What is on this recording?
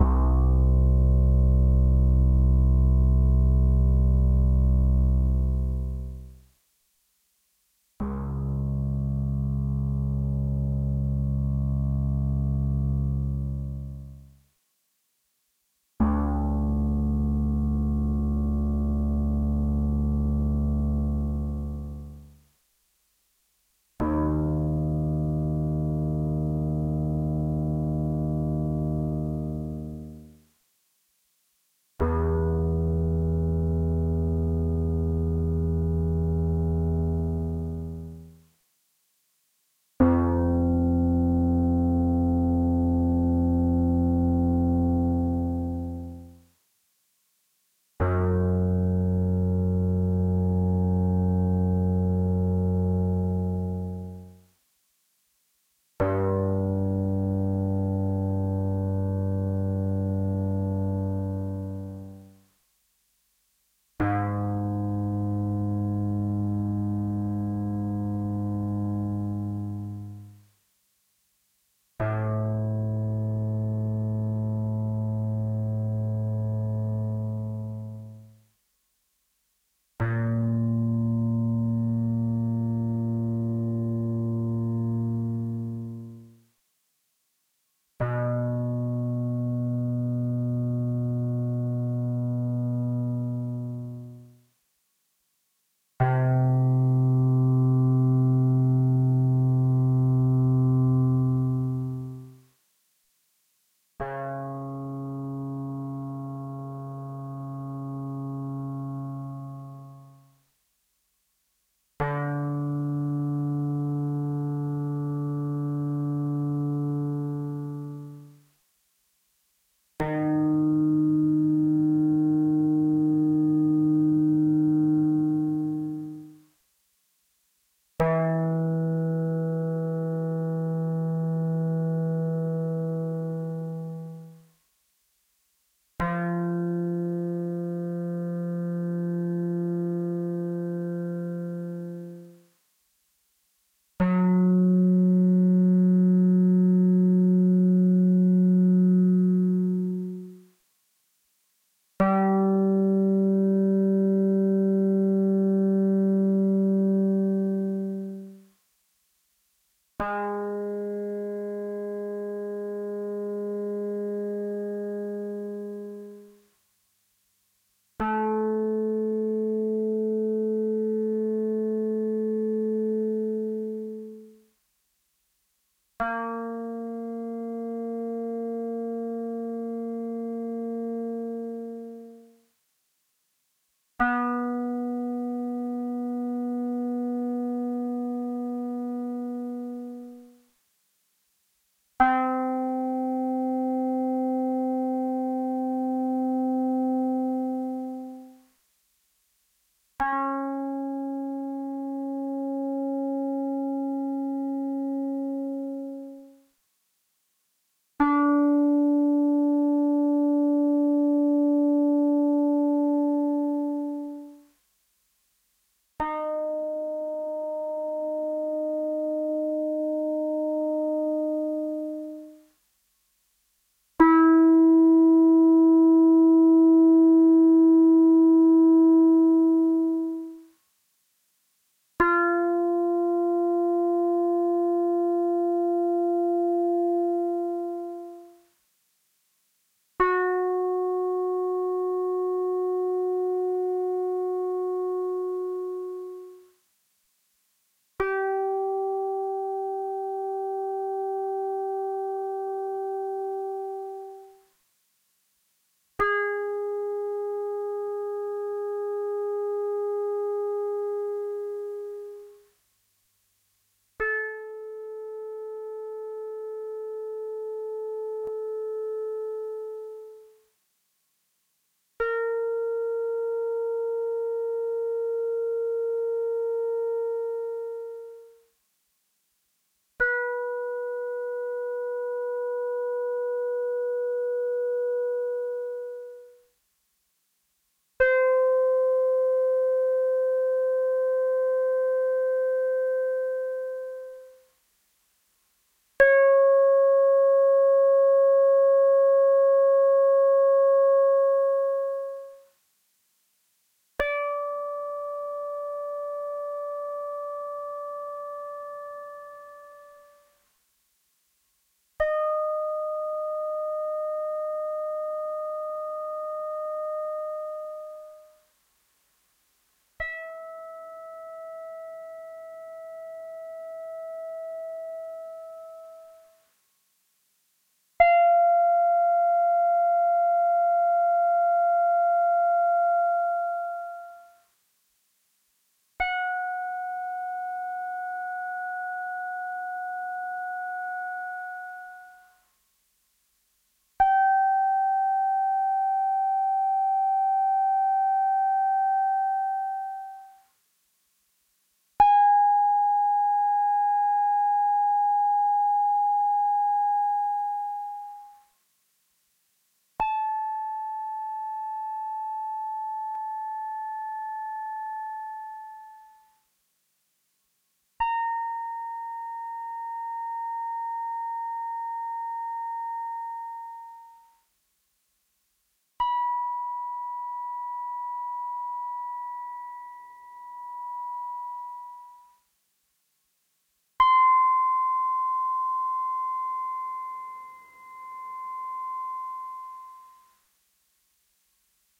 Analog Koto 1 Stereo C2-C6
Stereo analog koto notes from C2 to C6 recorded with analog synthesizer. Suited for QuickSampler.